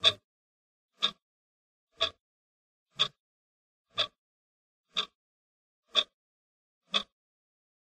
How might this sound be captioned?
a loop made by 8 beats of "clocking", DIY Mic recording, and digital noise reduction applied.
ambient, clock, effect